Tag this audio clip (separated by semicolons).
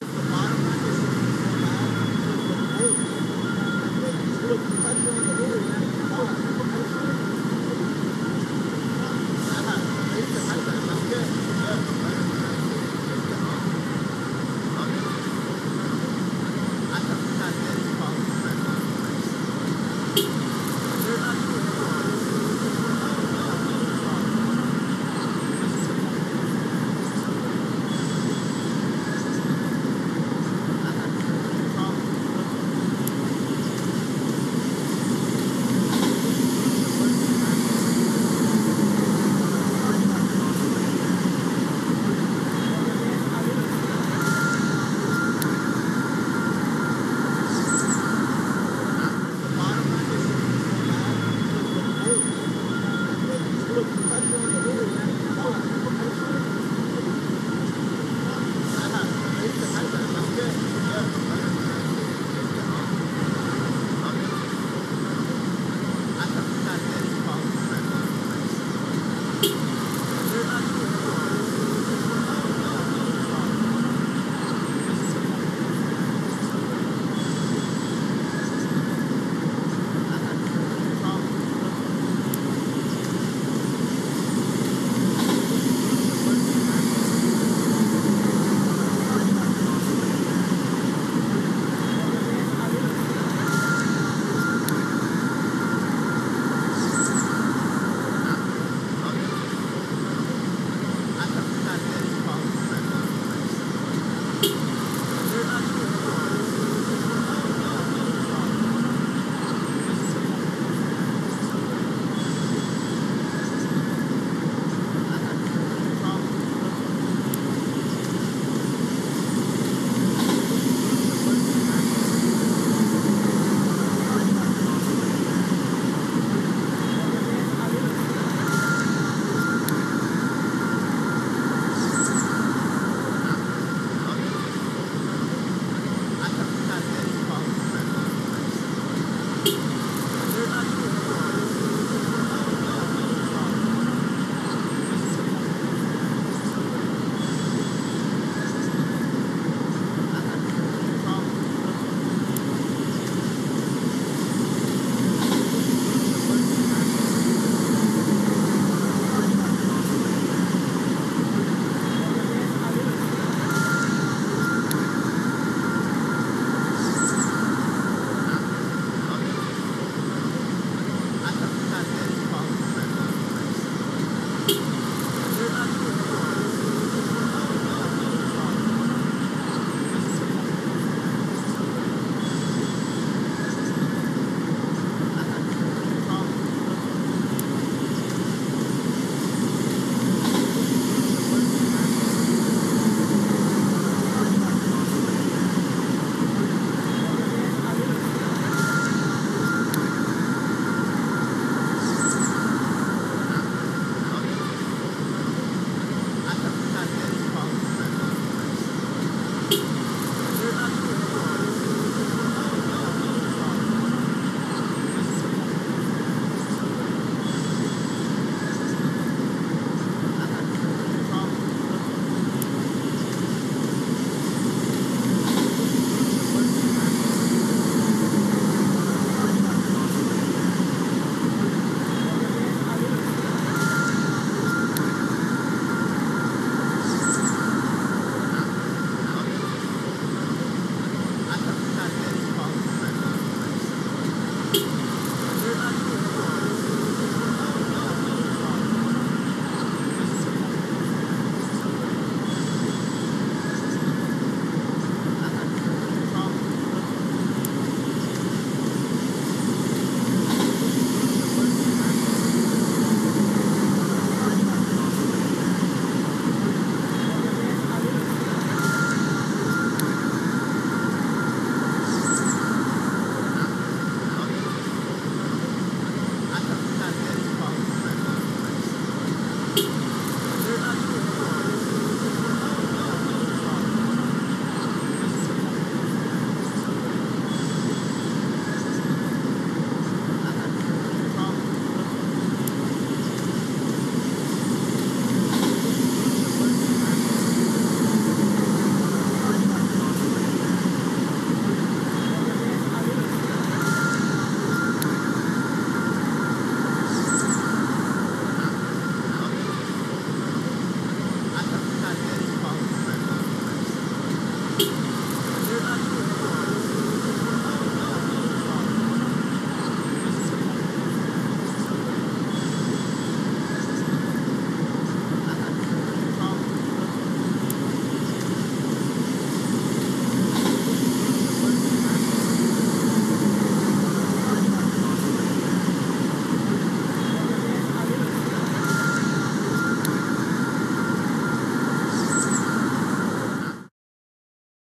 chatter; new; nyc; square; streets; traffic; union; urban; york